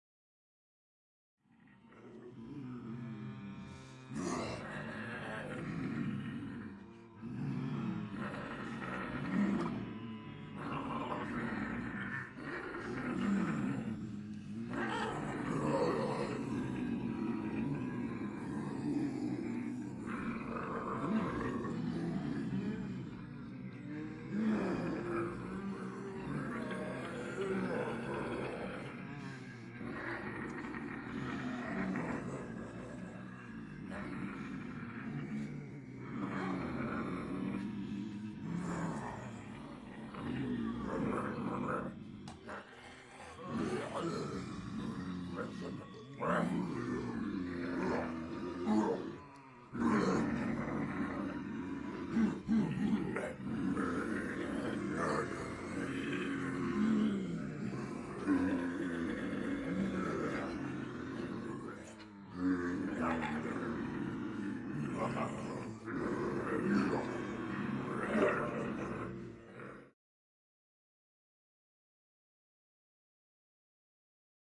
Zombie Group 3D
Multiple people pretending to be zombies, uneffected.